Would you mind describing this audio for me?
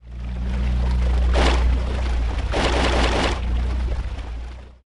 water combined
The sound of a babbling brook/ stream reinterpreted as a sonic splash of water, combined with the mellow ambiance of a lazy river.
babbling, water